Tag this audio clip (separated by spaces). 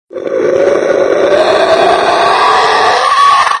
beast creature creepy growl horror monster noise roar scary screech unearthly vocal vocalization voice